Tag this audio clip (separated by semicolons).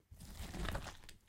vegtables; foley; violent; gore; splat; blood